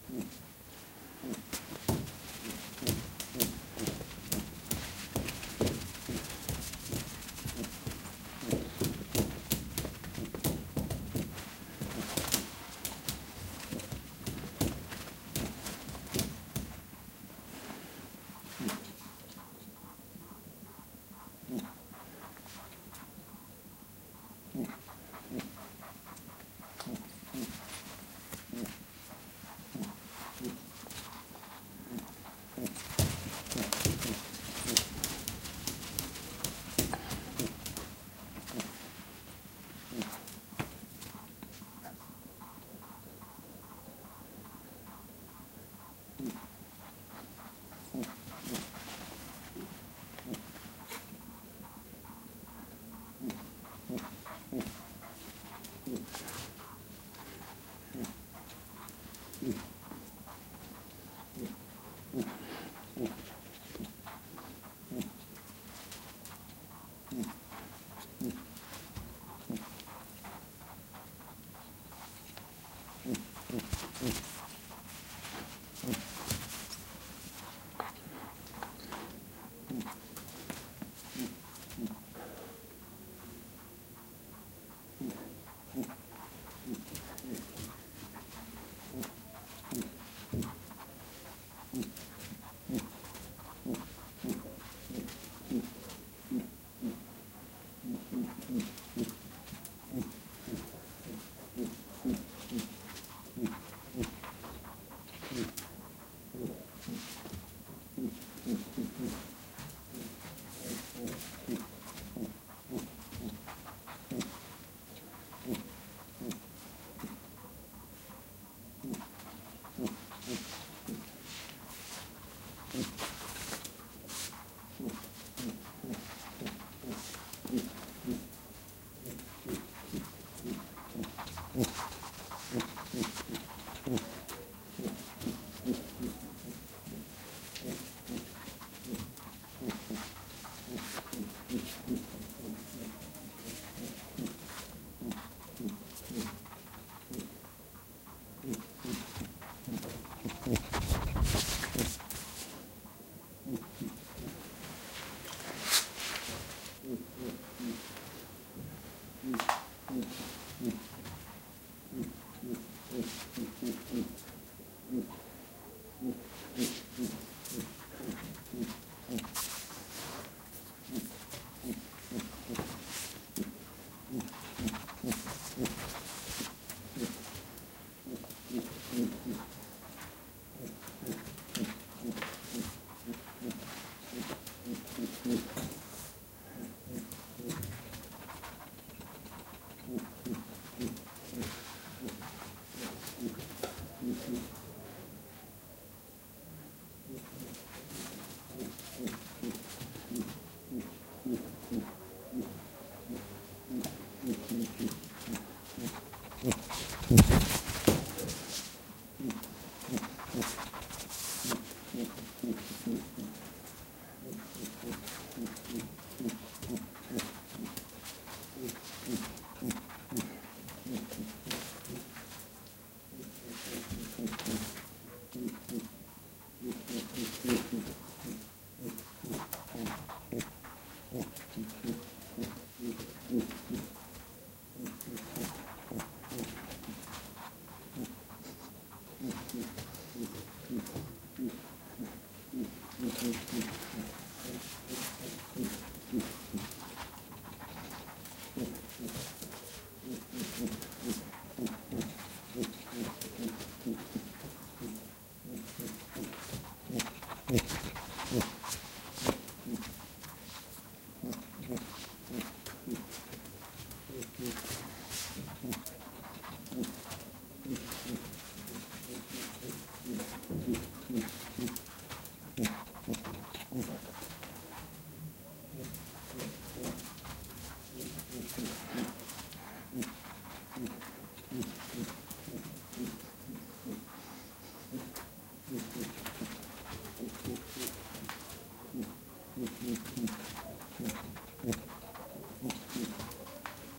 20091122.rabbit.frenzy
a pet rabbit runs in circles around the mics. He makes a soft grounting sound and, being sexually aroused (I guess) ehr... tries to copulate with the mics a couple times. Sennheiser MKH60 + MKH30 into Shrure FP24, to Edirol R09. Decode to M/S stereo with free Voxengo plugin